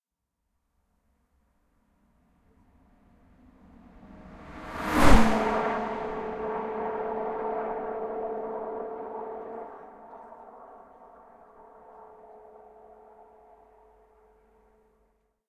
Aston fly by
Aston Martin DB9 recorded on a track, with a pair of AKG C414s, into M-Audio USB soundcard onto Cooledit.
Mics were set on omni, spaced approx 2M apart back to back, no high-pass or pads.
Note: possibly due to the distance between mics, this sample doesn't sound as good on headphones. If played with a stereo speaker set-up with sufficient sub then the stereo "pressure wave" from the car passing at high speed can be hair-raising, although this is obviously less likely with a mono sub.
This sample is un-compressed with no high pass filters, be careful with extreme levels, although the playing of this sample through large stereo P.A. systems is thoroughly recommended.
martin, fly-by, field-recording, aston, pass-by, db9